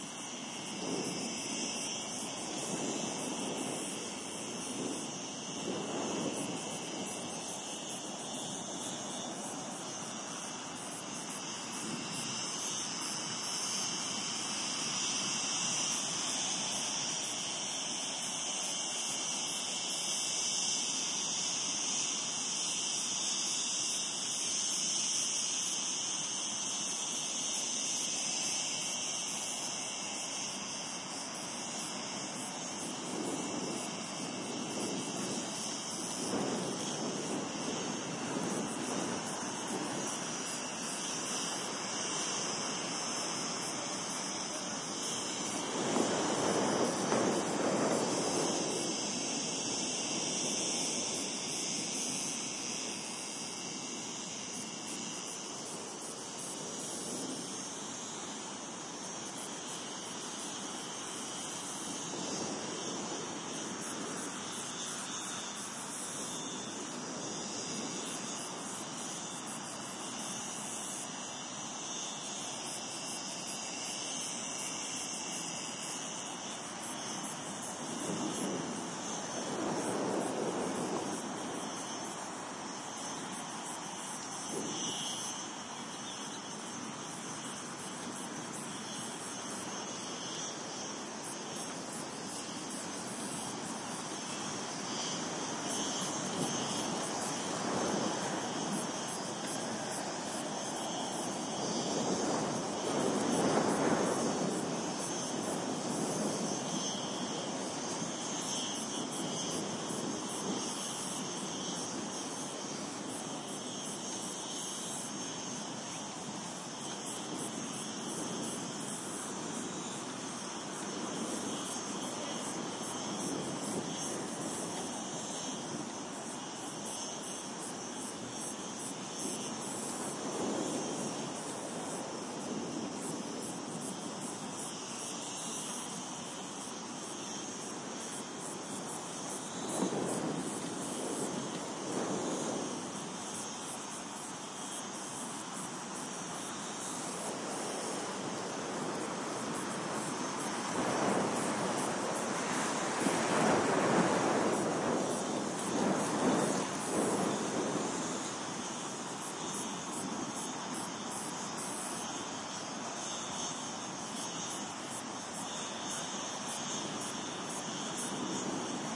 20130718 cicadas.wind.01
Singing cicadas, with gusts of wind every now and then. Primo EM172 capsules inside widscreens, FEL Microphone Amplifier BMA2, PCM-M10 recorder.
drought, wind, Spain